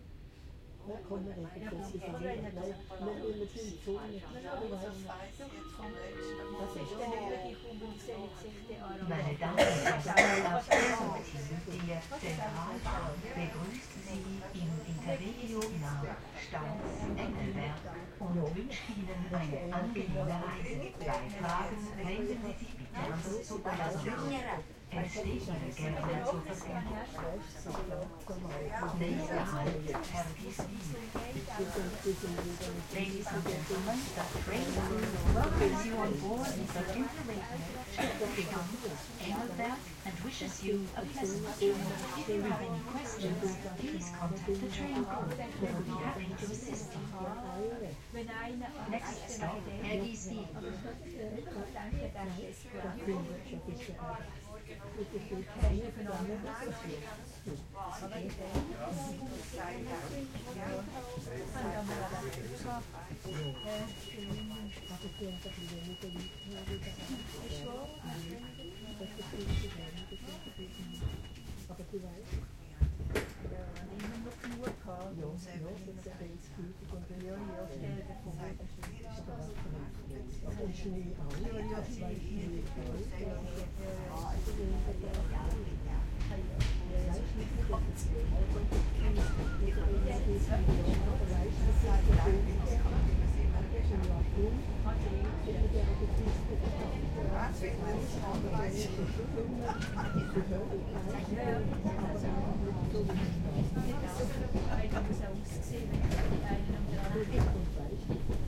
Train Luzern-Engelberg
Train from Luzern to Engelberg. There's some people talking Swiss-german. You can hear the announcement through the speaker, the door, and the start
schweizerdeutsch, train, swiss-german, Station, announcement, Luzern, Engelberg, voice, ambience, people, field-recording